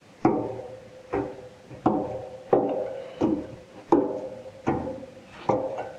Footsteps...
NOTE:
These are no field recordings but HANDMADE walking sounds in different speeds and manners intended for game creation. Most of them you can loop. They are recorded as dry as possible so you should add the ambience you like.
HOW TO MAKE THESE:
1. First empty two bottles of the famous spanish brandy Lepanto.
2. Keep the korks - they have a very special sound different from the korks of wine bottles.
3. Then, if you're still able to hit (maybe you shouldn't drink the brandy alone and at once), fill things in a flat bowl or a plate - f. e. pepper grains or salt.
4. Step the korks in the bowl and record it. You may also - as I did - step the korks on other things like a ventilator.
5. Compress the sounds hard but limit them to -4 db (as they sound not naturally if they are to loud).